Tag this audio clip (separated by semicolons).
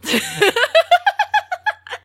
real; laugh; girl